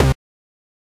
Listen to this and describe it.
A collection of Samples, sampled from the Nord Lead.